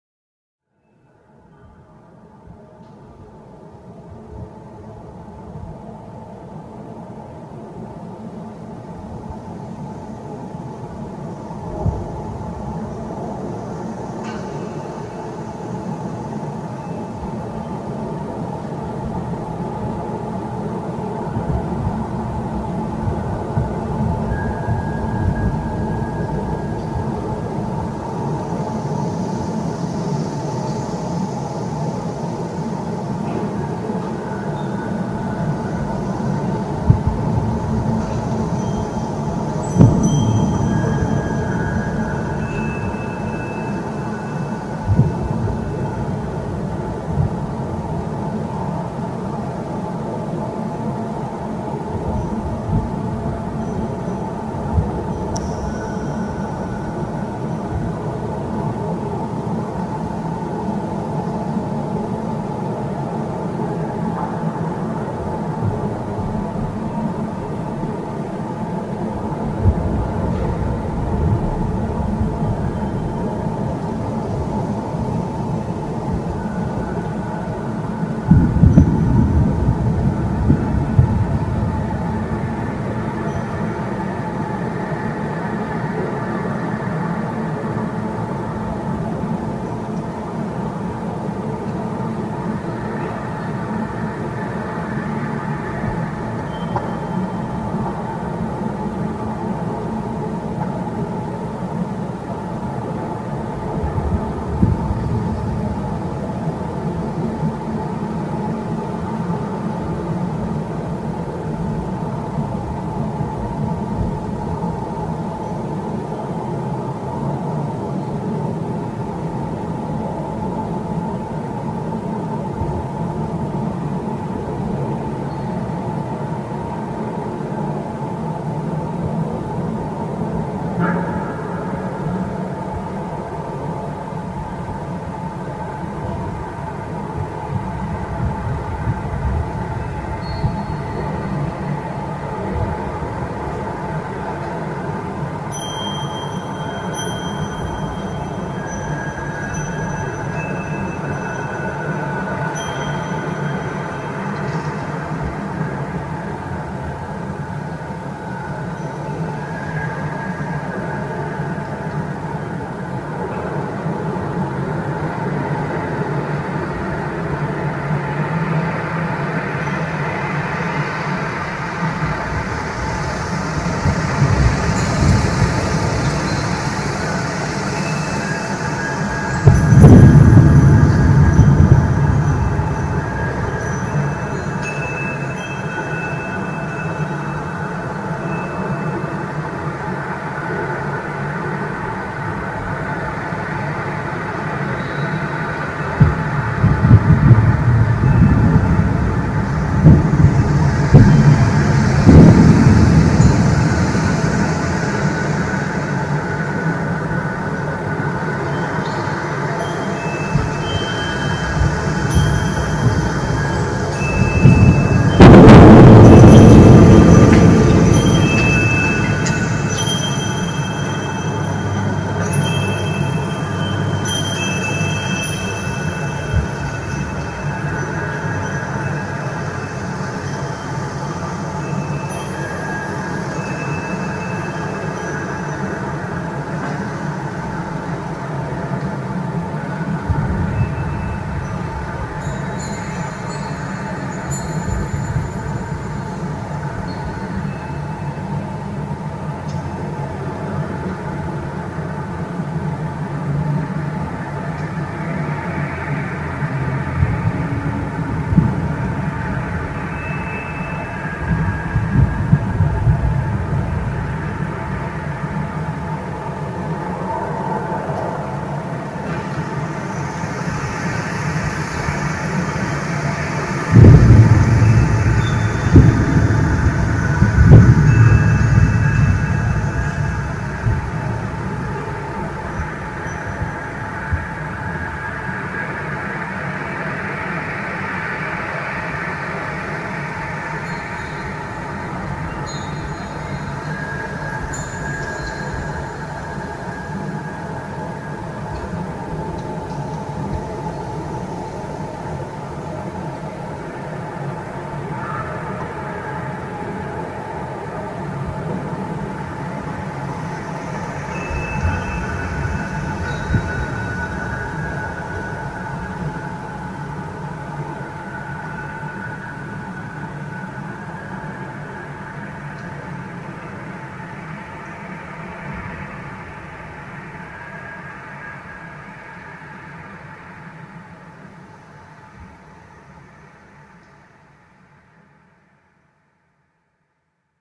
Wind and windchimes recorded on mobile phone through open window. Slowed down with reverb added.
weather, wind